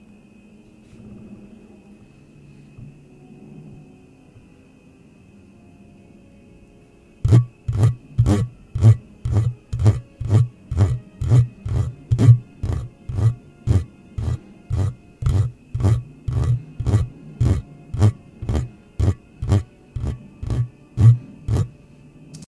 I scratch the microphone.